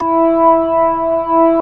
real organ slow rotary